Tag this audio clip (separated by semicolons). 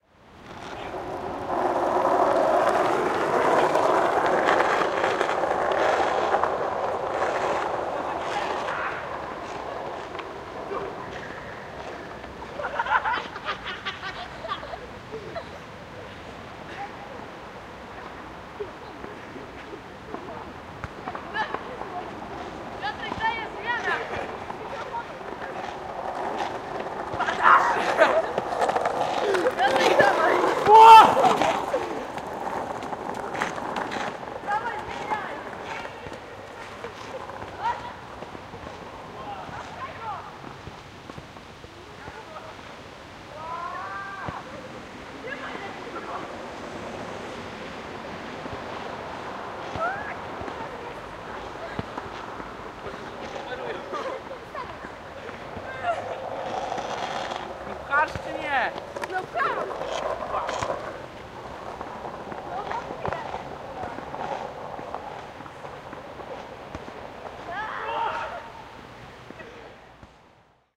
skateboard; field-recording; Szczepin